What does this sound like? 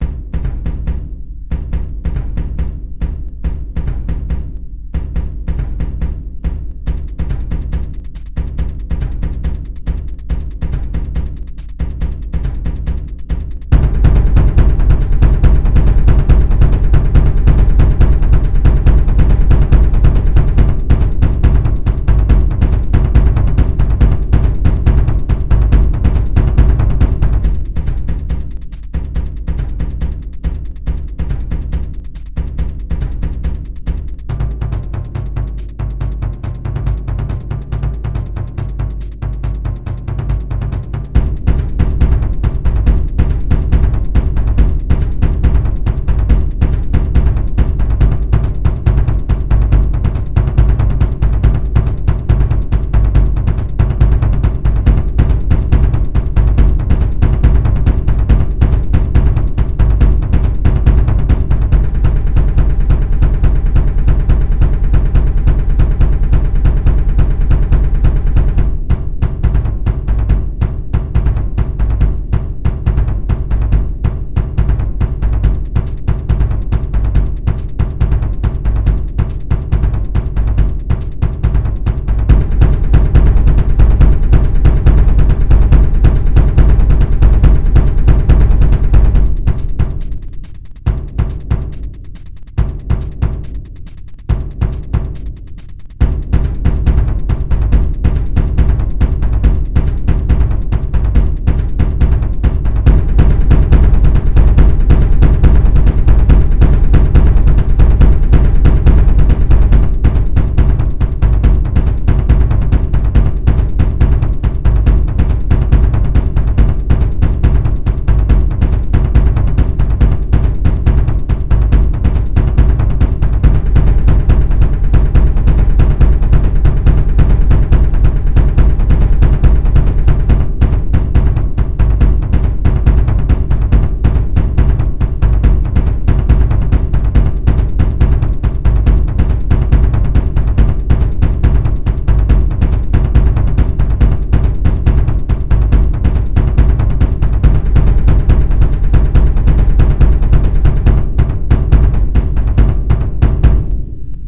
Music made 100% on LMMS Studio. Instruments: Brushes, bassdrum acoustic, tons.
I had to redo the music, because the first version had the volume of badly regulated instruments. I hope this time is better.